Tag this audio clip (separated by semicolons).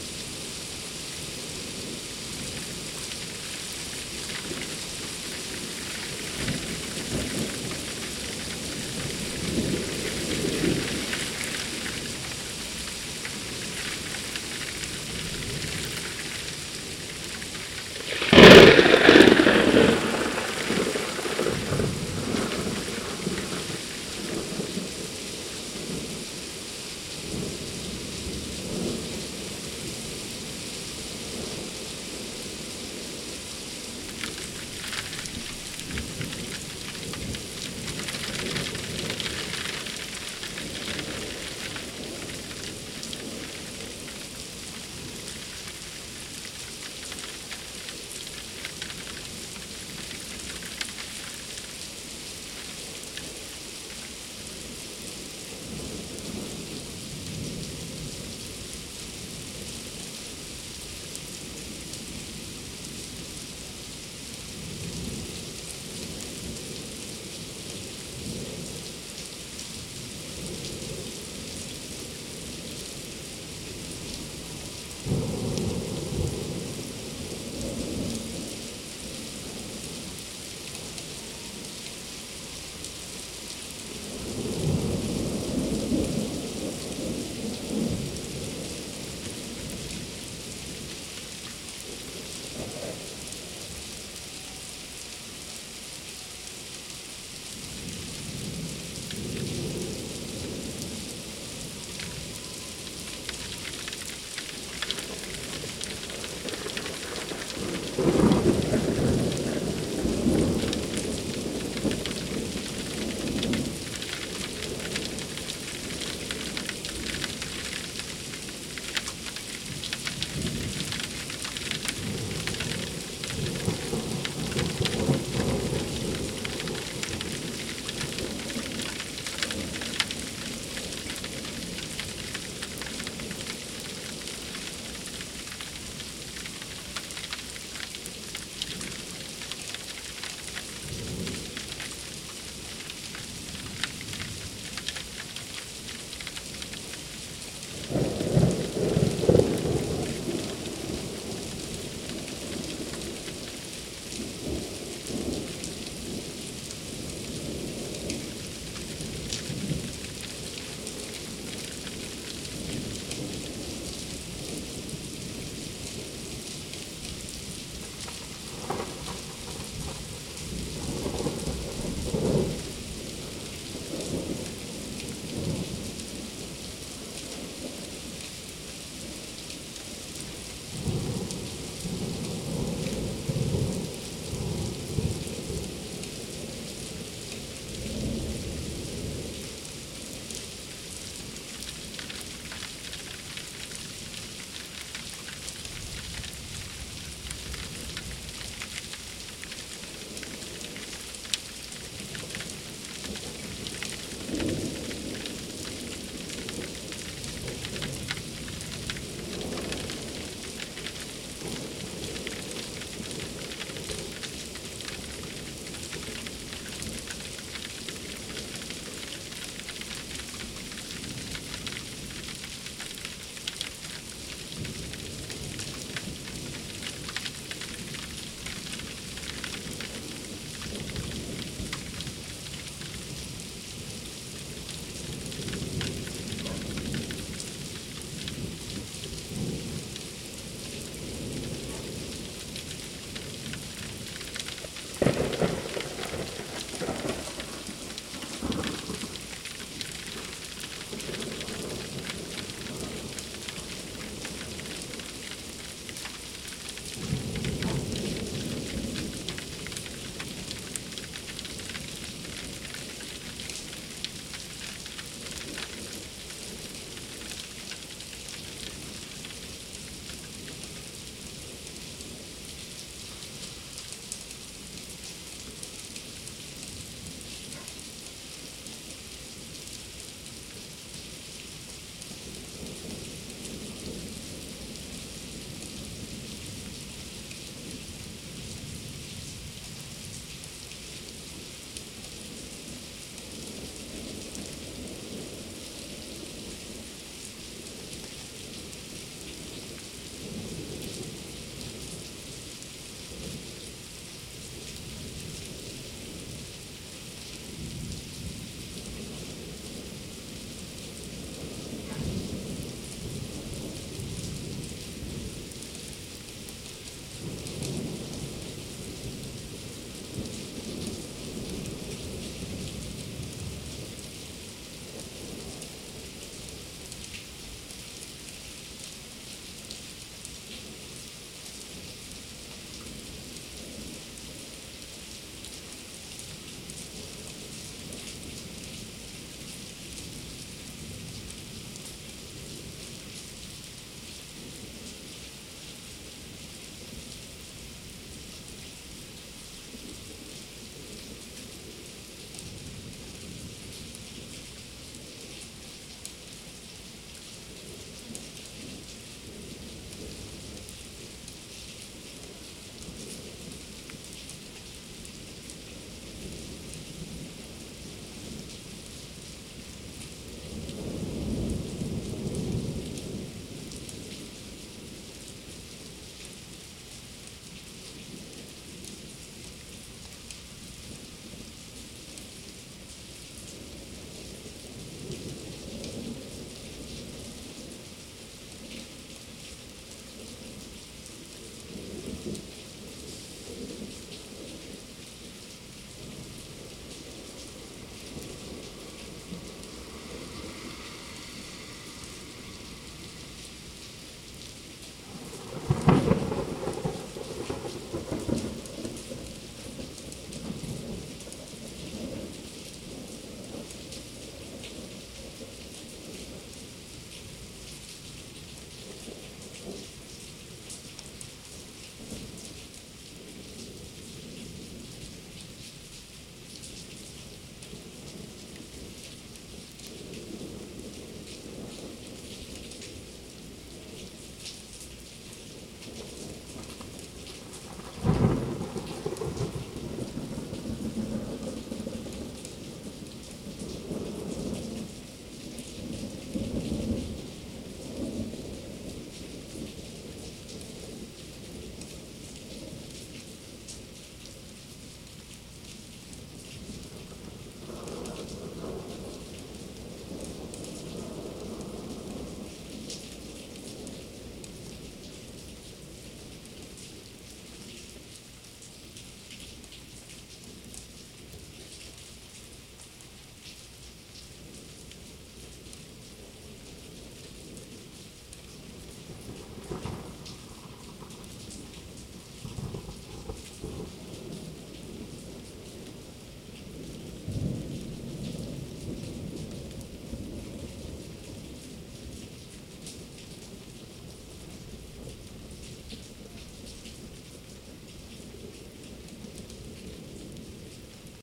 field-recording; rain; thunder-storm; weather